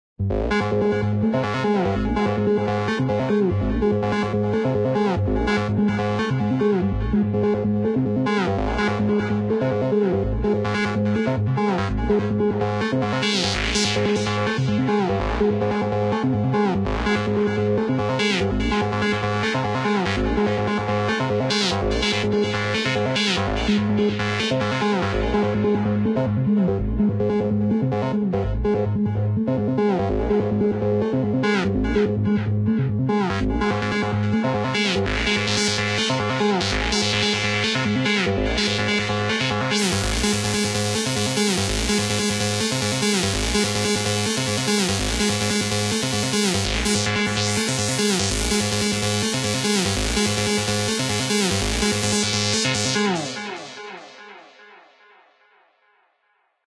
Psychedelic riff made by myself with FL studio 12 as the DAW and Hive as the vsti. Bionic Delay.
Comments is greatly appreciated!